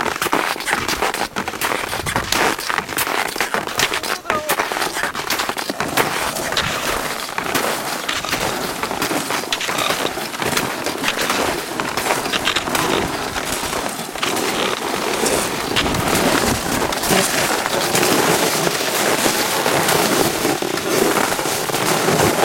ski fiskeben pulk
This recording is from a day we went out for crosscountry skiing at Gaustablikk, Rjukan, Norway. It was recorded with my camcorder and the built-in mic. You can also hear a pulk at the end of the recording. Weather: nice and sunny about -7 degrees C.
snow, winter